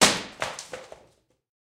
Recording of some sort of wood being broken with something like a hammer, pieces then falling onto other pieces of wood and possibly a concrete floor of a closed garage. Has a lot of natural room reverberation. Was originally recorded for smashing sound effects for a radio theater play. Cannot remember the mic used, perhaps SM-58, or a small diaphragm condenser; but it probably went through a Sytek pre into a Gadget Labs Wav824 interface.